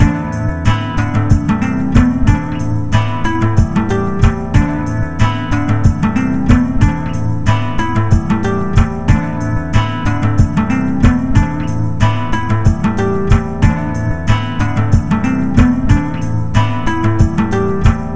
Soothing guitar
It's a relaxed guitar loop with some percussion in it
Guitar, loop